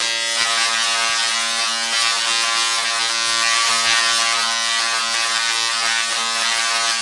Tesla Coil - Electricity
Tesla Coil Electricity
Recorder: Zoom H1
constant, tesla-coil, Electricity, thunder, energy, loud, shock